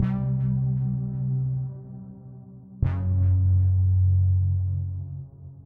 BOC to Work 6
85BPM, Bass, DnB, Drum, loop, Synth